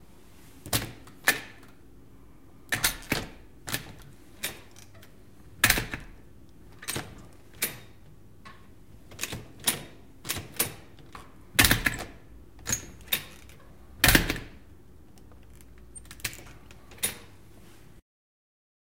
DOORHANDLE METAL
Door Open Close
Close, Door, Doorhandle, Metal, Open